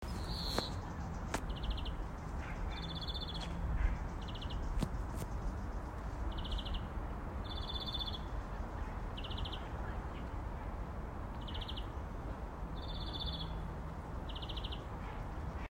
Sound of nature sound of nature with birds singing.